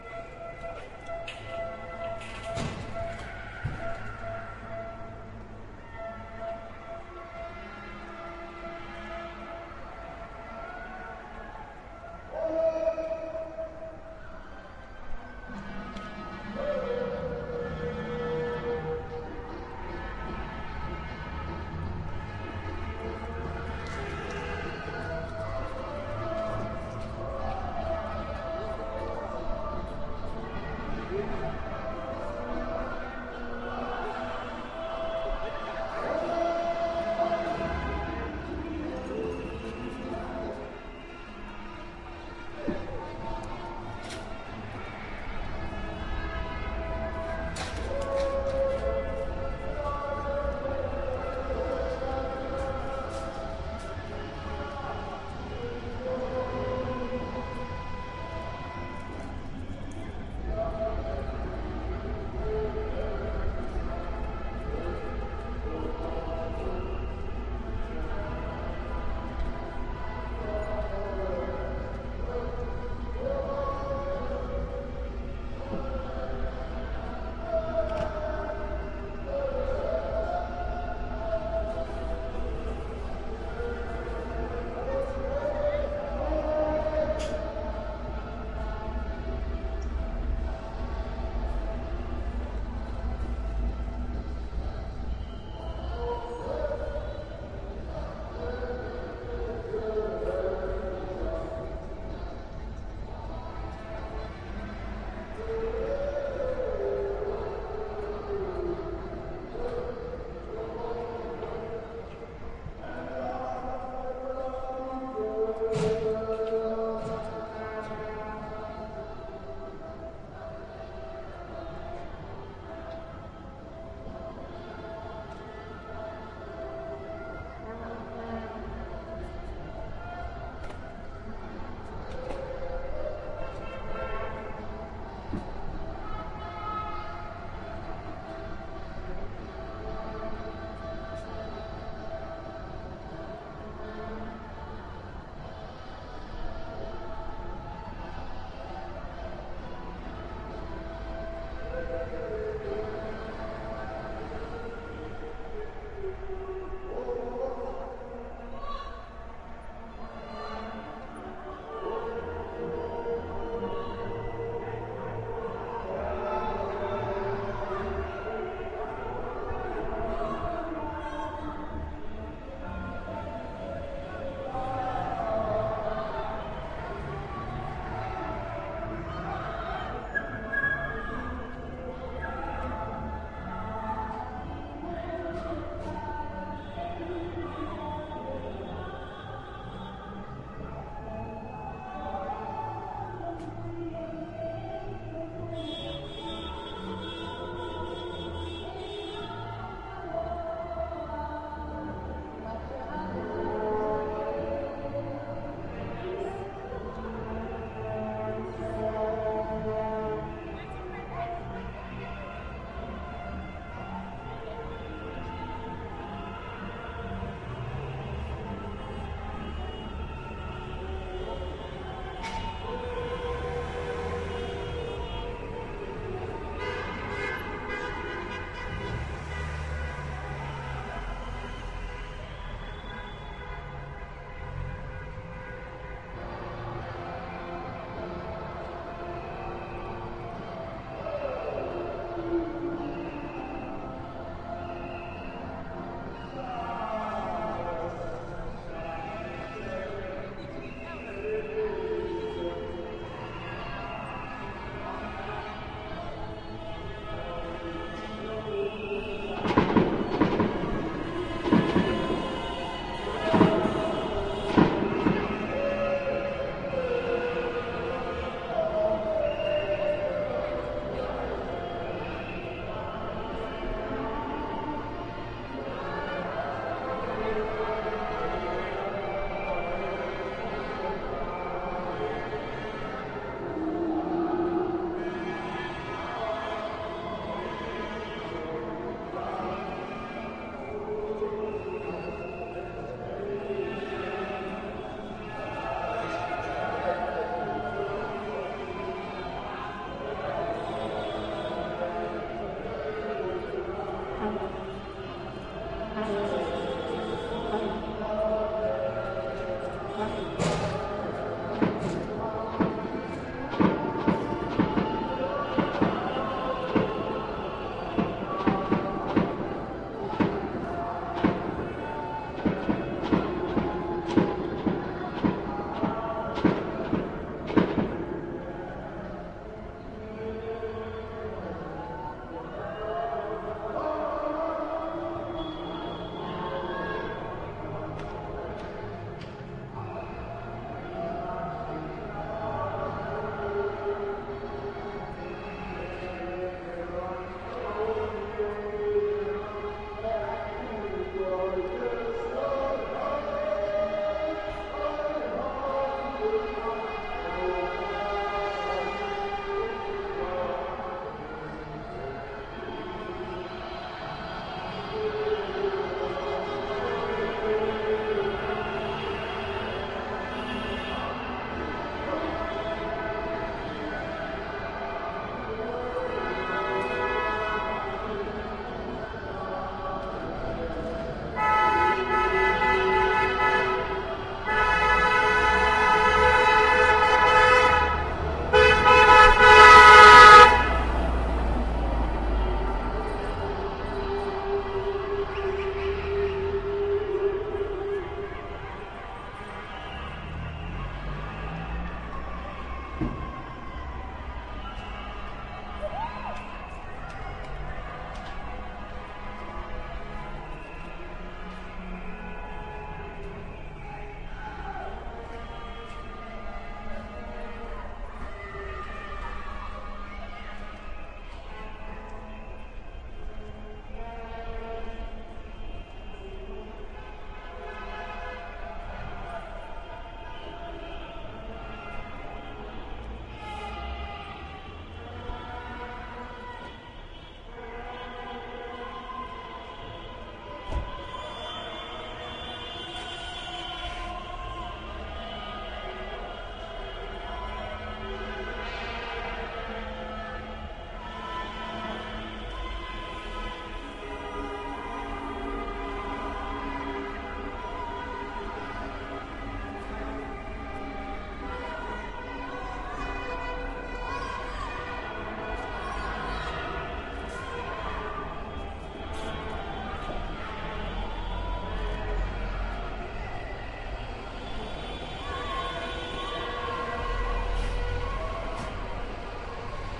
Rotterdam (NL) city ambience during the FIFA world championship 2010, the game between Germany and Holland. The funny echo's are natural - something between the buildings. And yes, there is a drunk dude with an amplifier and a microphone somewhere. It aint me.
fifa, wk2010, city, voetbal, ambiance, oranje, soccer